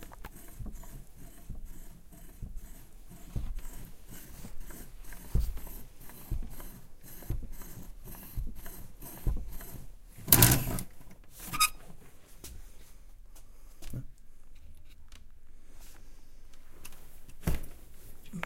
Favourite sound in livingroom of A.
home, house-recording, indoor, room